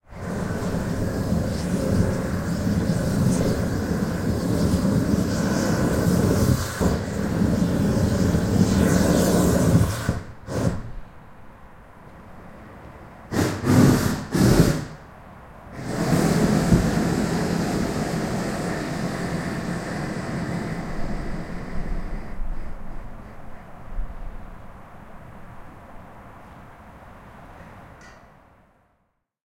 roofing works with propane burner. You can use it also for a hot air balloon Recorder with Zoom H4N.